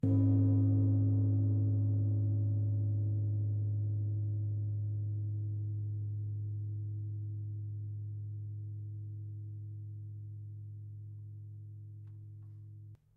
downward gongs
A descending series of gong strikes, from a field recording and later edited and processed for an original score